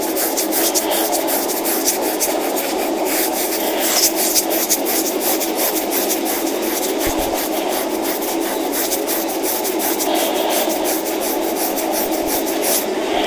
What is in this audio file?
scratching body sound
sound, body